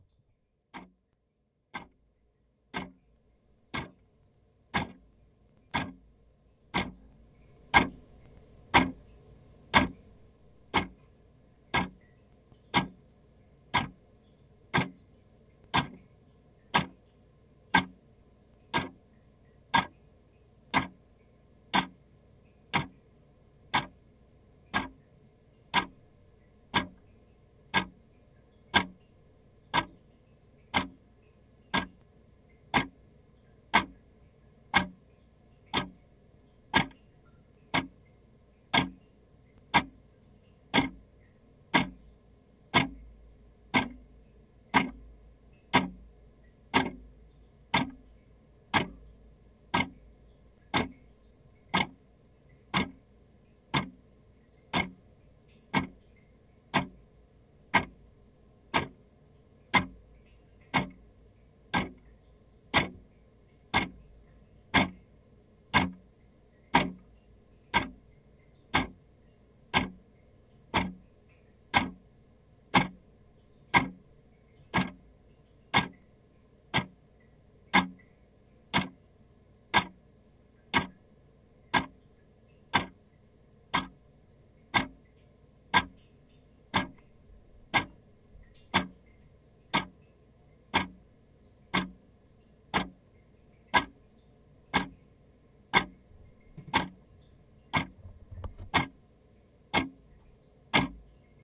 Contact mic recording of a clock.